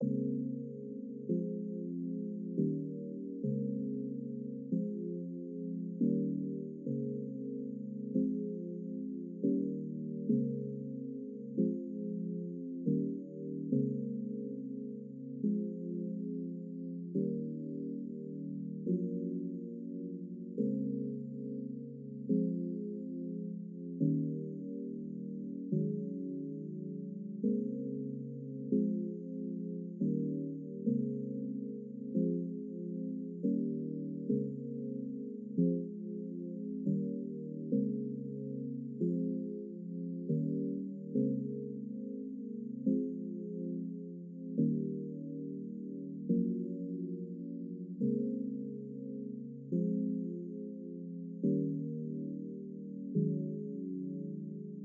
noname chord 140C#
140c#
cool sounding simple chord.
tried to leave it clean for you to mess around with.
but forgive me, for i have a strange addiction towards pixels